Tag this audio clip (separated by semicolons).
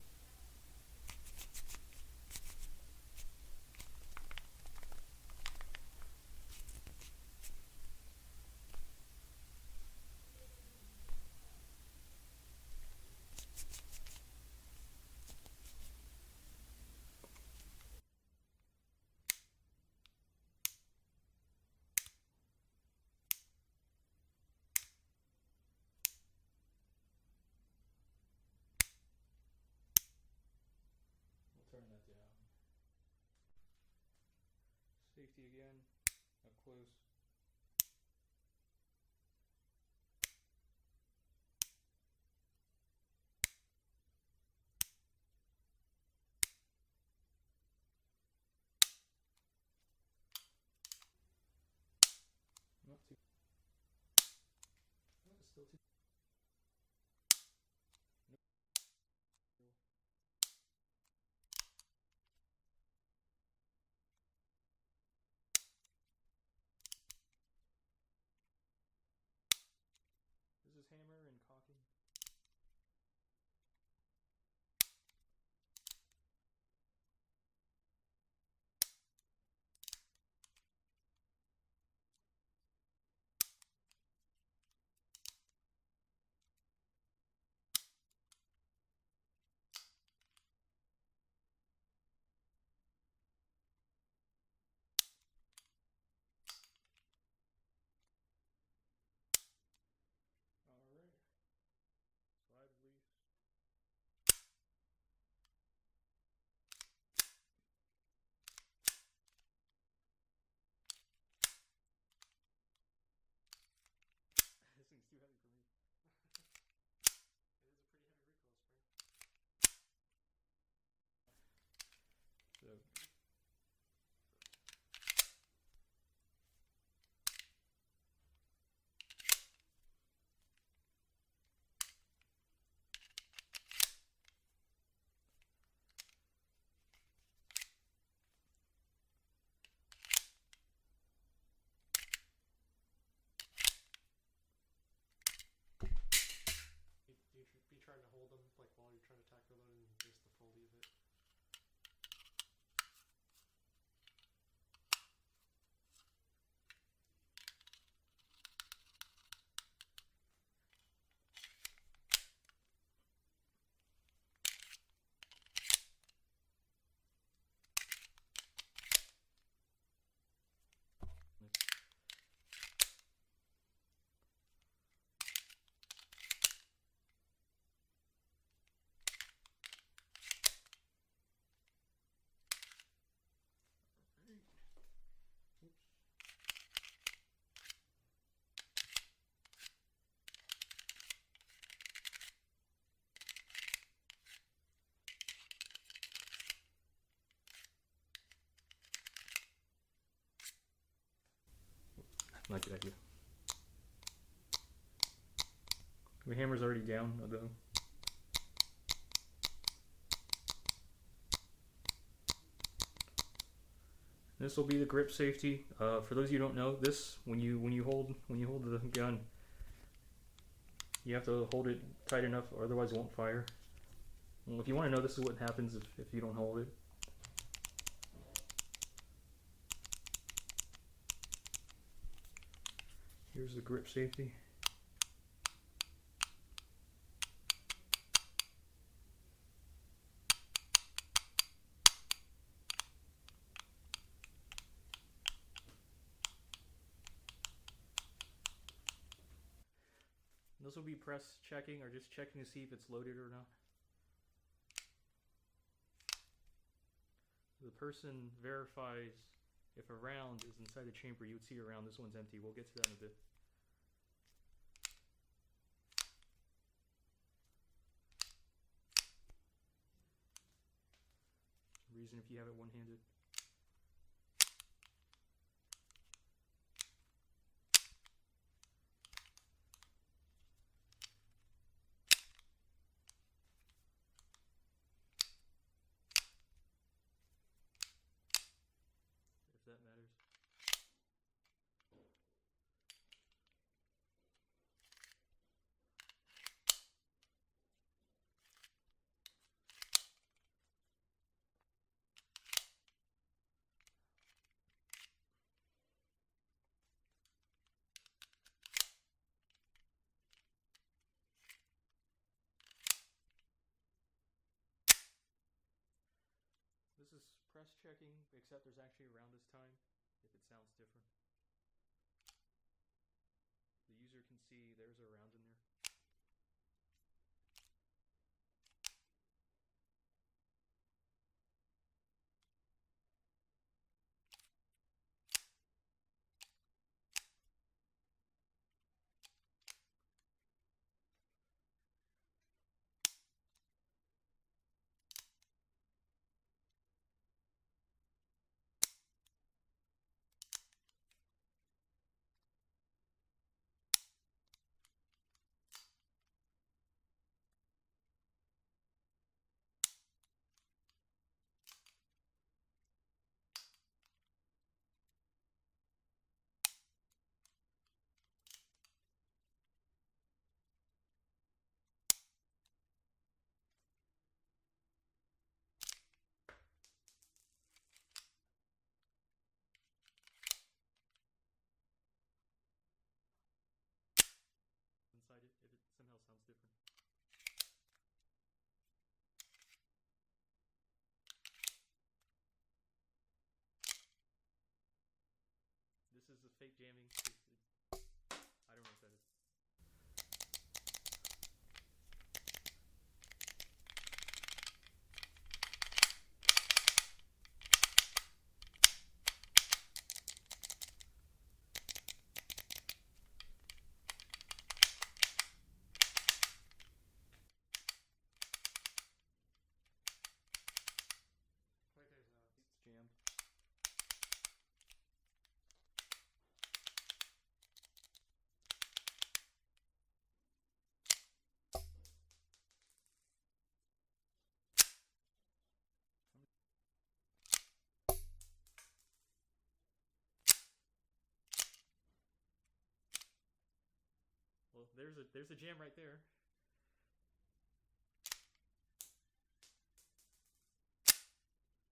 gun; springfield; 45; handgun; pistol; cock; slide; magazine; 1911